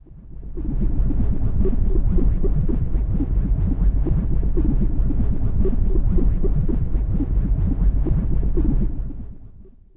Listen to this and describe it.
kaos ambience 1
Background sounds - experiment #1